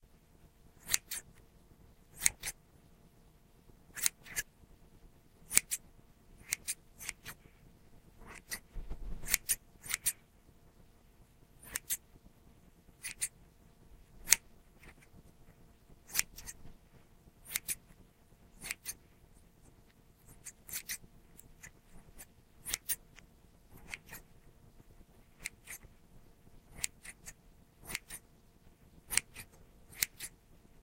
The raw sound of scissors clipping. Can be used for, say, hair cutting scenes.